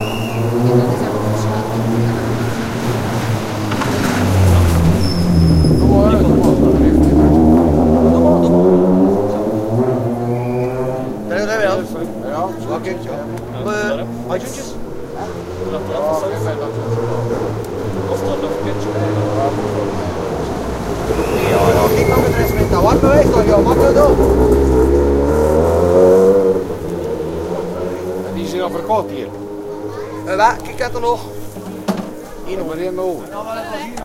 Two rally cars driving from the regroup podium to their service areas prior to starting a new leg.Recorded with Tascam DR-100 in Ypres Belgium. You also hear the sound from a BBQ stand selling sausage.